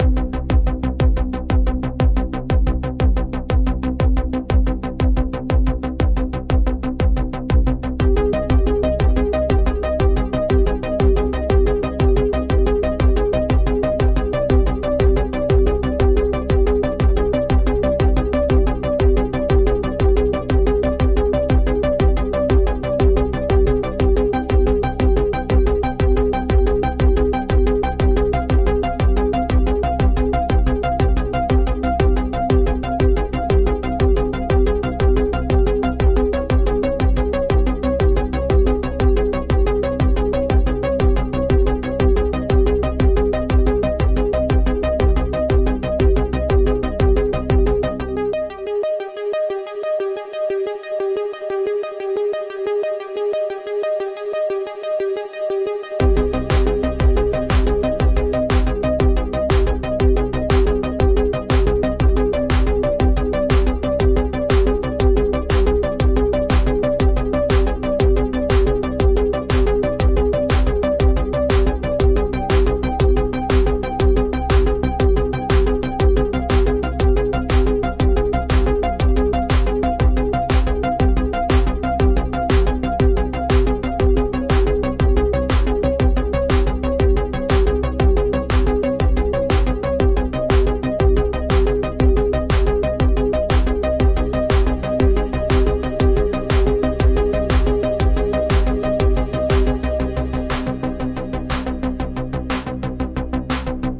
Techno 80 - base loop
Synths: Ableton live,Synth1,Silenth.
dance, techno